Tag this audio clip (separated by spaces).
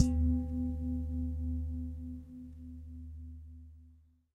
household percussion